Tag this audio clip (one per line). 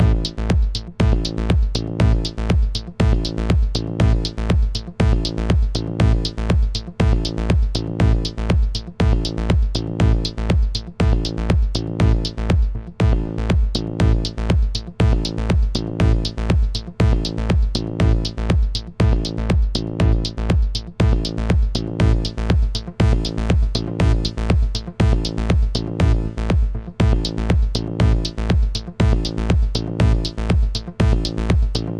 base
bass
Clap
Dance
Drum
Drumloop
Drums
EDM
Electro
HiHat
House
Loop
Minimal
music
Percussion
Techno
x1